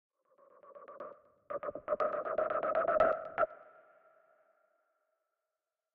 Whoosh StutterMuted ER SFX 11
air, chop, chopped, choppy, long, soft, stutter, swish, swoosh, swosh, transition, whoosh